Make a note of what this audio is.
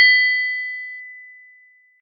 complete sound
Short bell sound for complete notification.
short, complete, bell